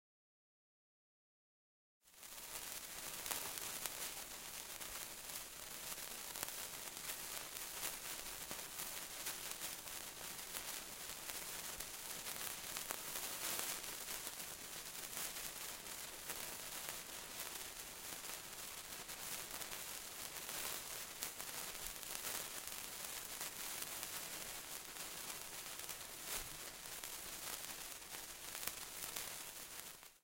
Sound of lighted christmas sparkler.